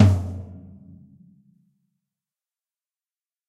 Toms and kicks recorded in stereo from a variety of kits.
acoustic, drums